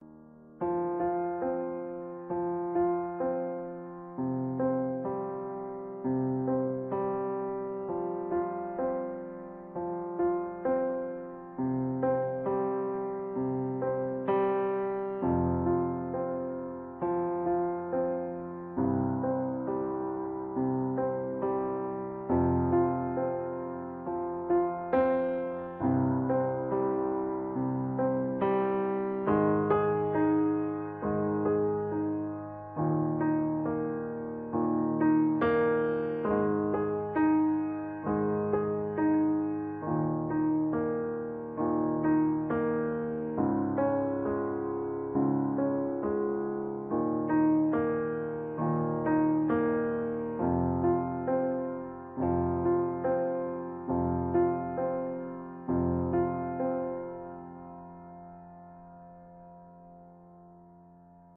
Prelude No 12
calm, no, prelude